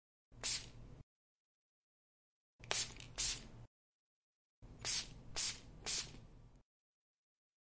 Spray Bottle
Here is the sound of someone spraying a bottle
Air, Bottle, Gas, Splash, Spray, Water, aerosol, puff, smell, smelly, wet